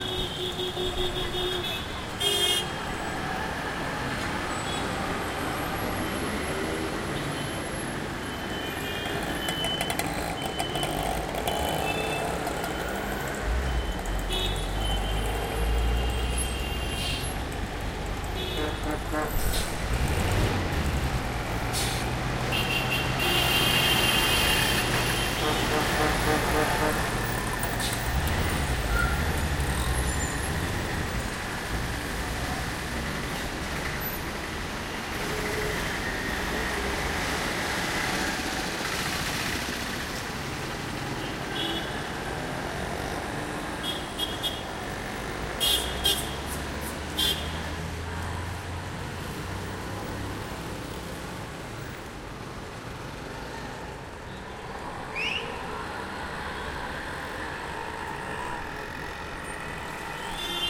TRAFIC-sound-sterio